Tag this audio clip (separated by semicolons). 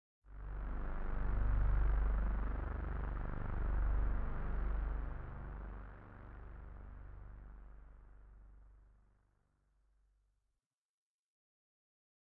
bass; creepy; eerie; hit; synth